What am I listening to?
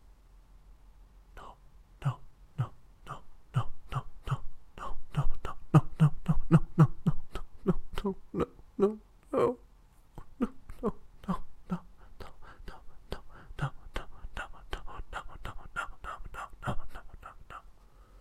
Some horror sounds I recorded.
Thanks very much. I hope you can make use of these :)
creepy, disturbing, ghost, ghostly, haunting, horror, panicked, scary